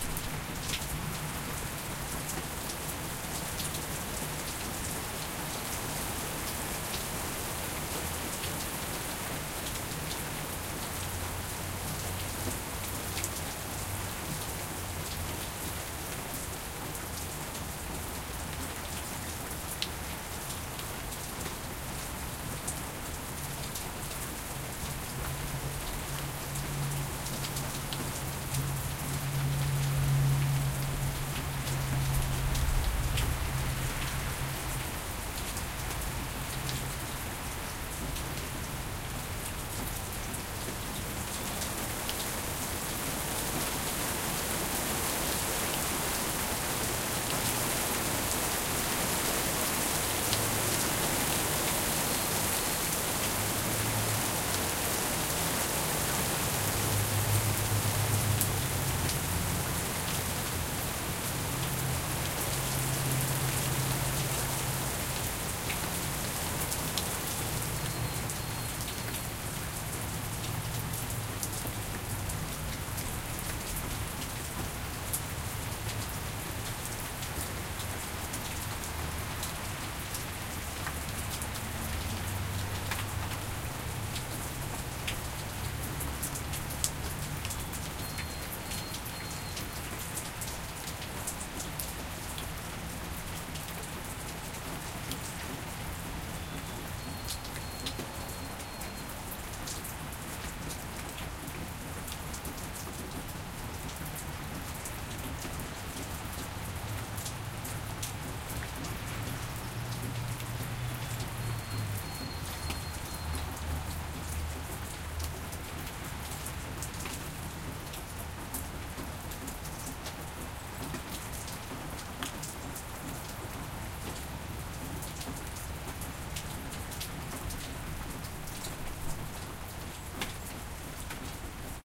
Rain Under Deck
Recorded underneath a leaky, wooden deck. Rain intensity was increasing periodically during the storm. In the background is a moderately traveled road with light night-time traffic.